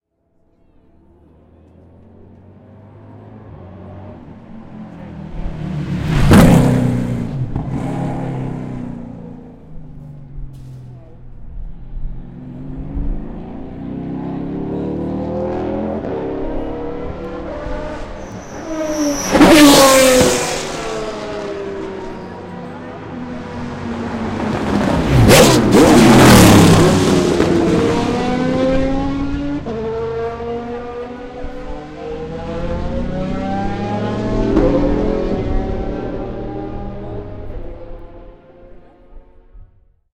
FiaGT.08.PotreroFunes.RedHotBrakes.1A
FiaGT Practice at “Potrero de los Funes” SanLuis.
The Brakes get about 700degrees when they slow-down from 260km to 80.
Oh… My Stomach…it still hurts !!